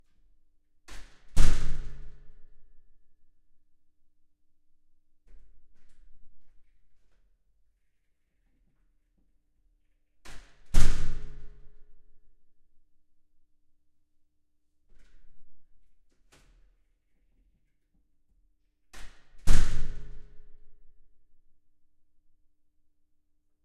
Recorder: Fostex FR-2
Mic(s): 2x Audix SCX-1 O (Omni)
Mic Position(s): in the center of a 1.5m wide, but long hallway, about 18cm apart; 2m away from door; about 1.5m height; 'inside'
Opening and closing of a heavy metal door (with big, heavy security glass inserts) within a long (flat concrete) hallway.
This recording was done on the 'inside', meaning that the door swings towards the mics while opening.
Also see other recording setups of same door within package.